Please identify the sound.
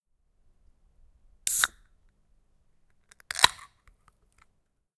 Beer Can
Recorded outside in an extremely quiet environment. Zoom H5 internal XY capsules.